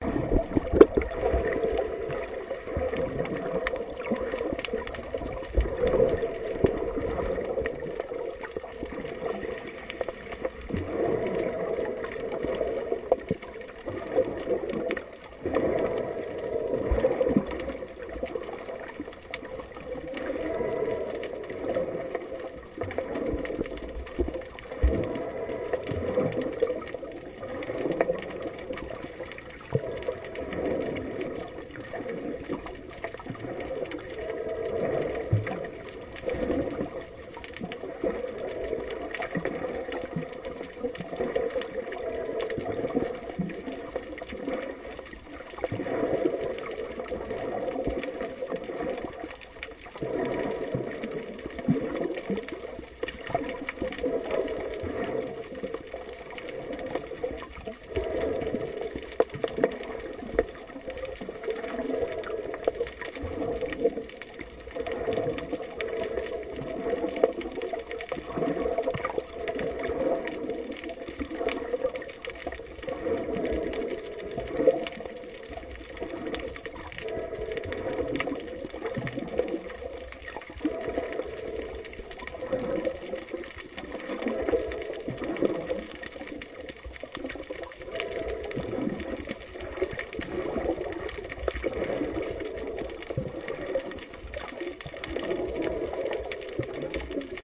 Underwater sound, recorded with an action cam while i was doing snorkeling.